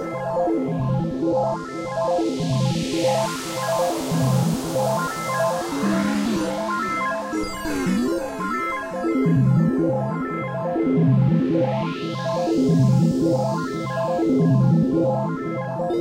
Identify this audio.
Don'tGoFX005
Radio interference-like synth sounds recorded from a MicroKorg. Slightly bubbly, rising and falling effect.
noise, radio, robot, static, synth